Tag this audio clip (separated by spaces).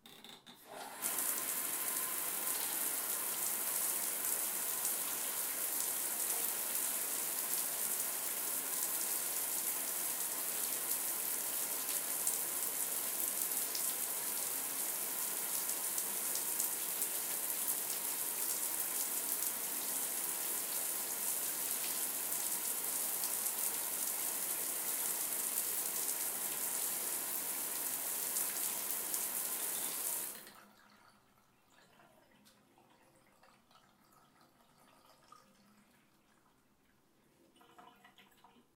bathroom faucet off shower water